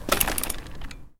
A bike falling on the ground, recorded with a Zoom H2.